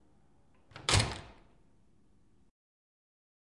Door Open Close